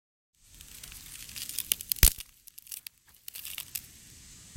Stick breaking
Just a sound of a relatively small stick being cracked in half.
branch, branches, break, breaking, crack, cracking, forest, snap, snapping, stick, sticks, trees, wood, wooden